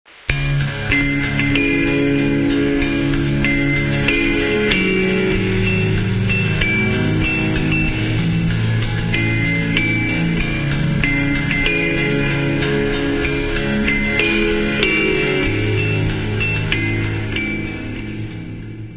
The sound of standing up or fighting for a cause.